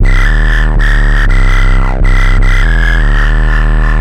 DeepBassloop2 LC 120bpm
Electronic Bass loop
loop, bass